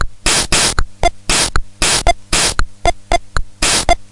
Casio pt-1 "bossa nova" drum pattern